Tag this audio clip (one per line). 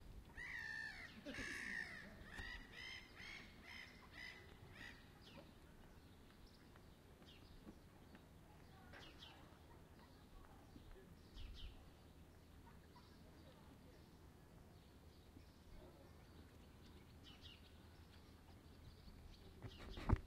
ambiance
ambience
ambient
bird
birds
birdsong
field-recording
forest
nature
spring